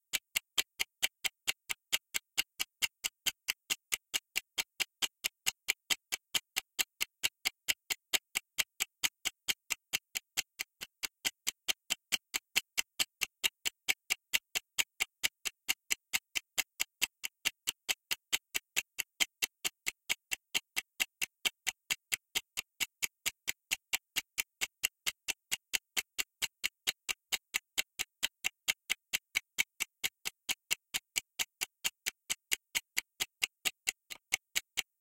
Ticking Timer 35 Sec
Ticking Timer
If you enjoyed the sound, please STAR, COMMENT, SPREAD THE WORD!🗣 It really helps!
bomb, tock, explosive-paste, timer, movie, film, tick, game, ticks, time, ticking, explosive, tick-tock, paste